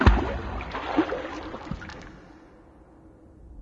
Splash Request
by request, a sound of a splash of water...
request, splash